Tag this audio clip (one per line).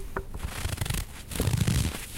foot
footstep
frost
ice
leaves
running
snow
step
walk
winter